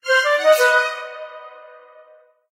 roll-ok
This is a notification for so-so roll in an online game. Created in GarageBand and edited in Audacity.